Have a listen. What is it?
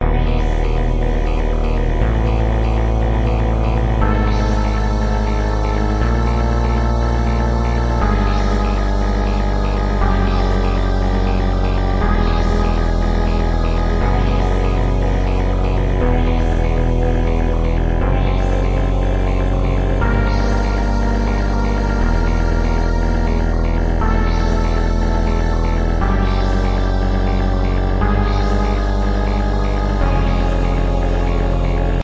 BAS-22022014 - Game Loop 1
Game Loops 1
You may use these loops freely if
you think they're usefull.
I made them in Nanostudio with the Eden's synths
(Loops also are very easy to make in nanostudio (=Freeware!))
I edited the mixdown afterwards with oceanaudio,
;normalise effect for maximum DB.
If you want to use them for any production or whatever
23-02-2014